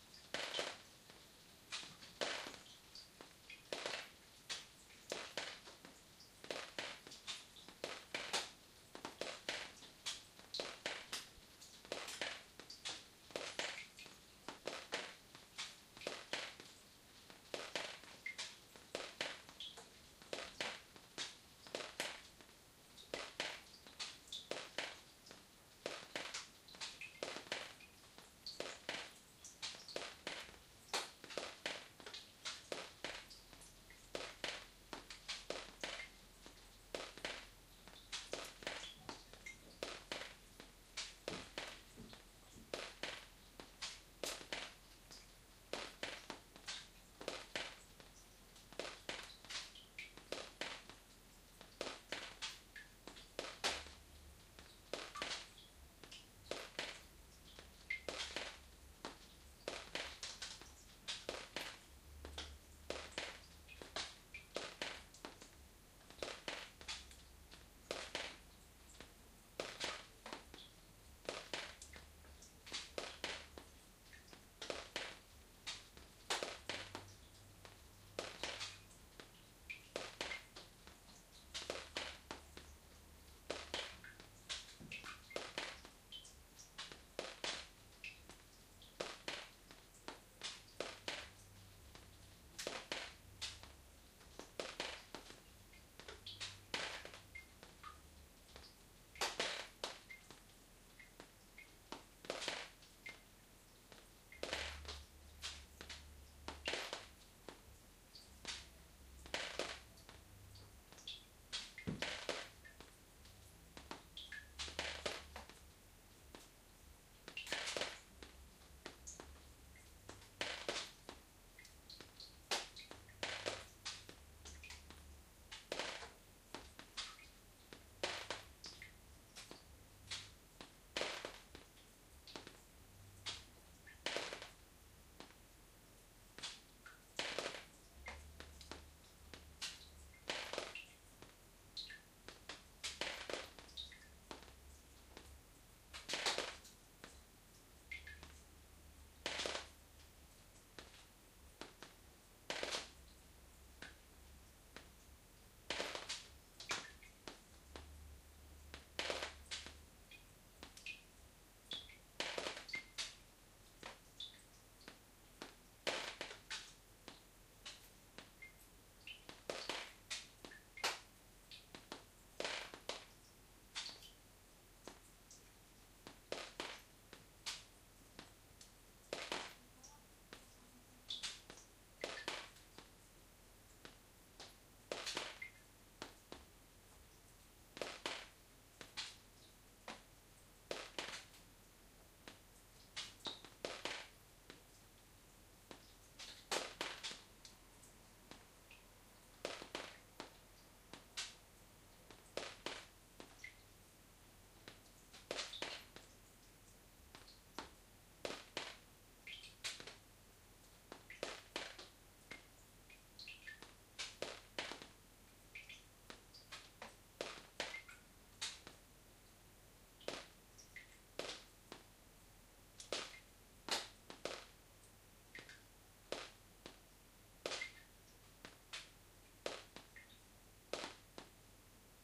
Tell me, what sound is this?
This is the sound of rain leaking through the glass roof of a shabby lean-to.